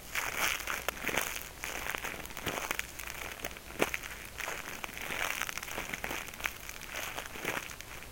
A quick walking sound I made for my game using a wrapper and a Turtle Beach headset.